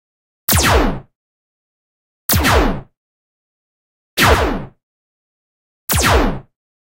Sounds of shots from sci-fi weapons. Synthesis on the Sytrus synthesizer Subsequent multi-stage processing and combination of layers. Enjoy it. If it does not bother you, share links to your work where this sound was used.